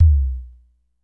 Nord Drum BD 6
Nord Drum mono 16 bits BD_6
BD6, Drum, Nord